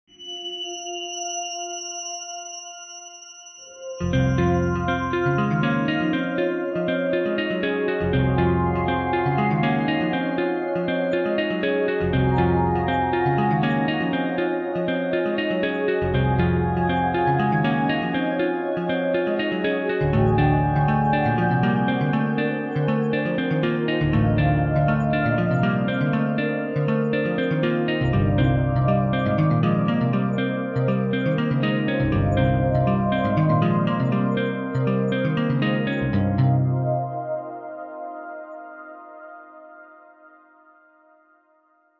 trespass theme
A short track with mysterious mood suitable for using as a title musical theme or a video soundtrack.
portal, score, delay, tresspass, piano, soundtrack, sci-fi, theme